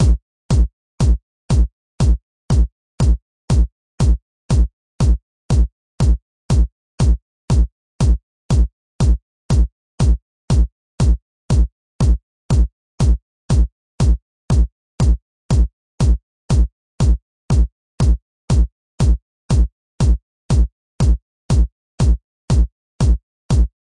friend me kick
12 Bars of Kick drum heavily layered and processed. Sounds louder than it is / distorted.